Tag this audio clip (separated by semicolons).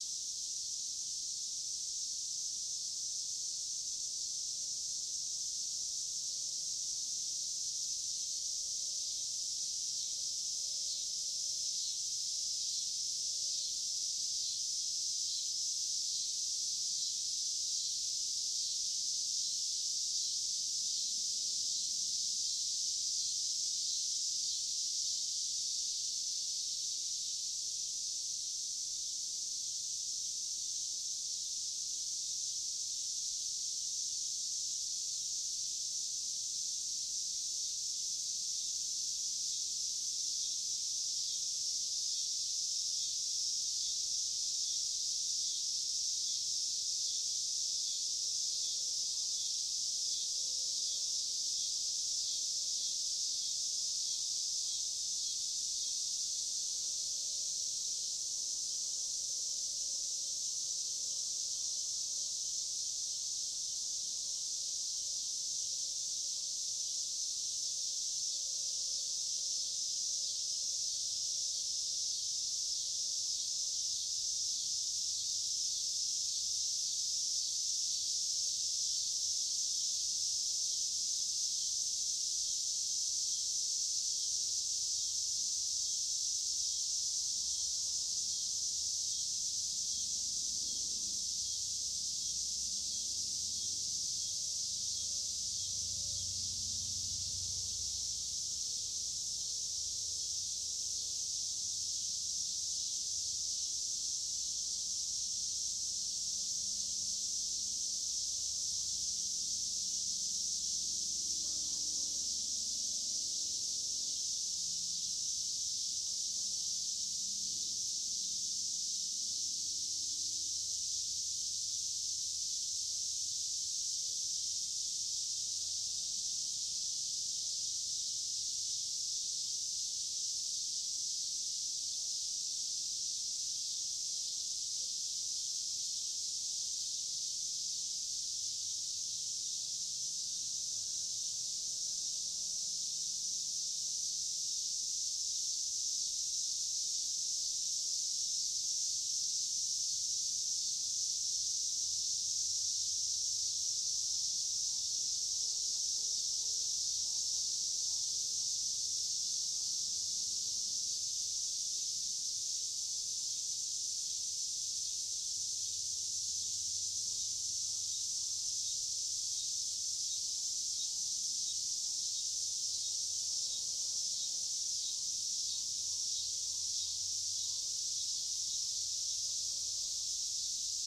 summer; cicadas; Midwest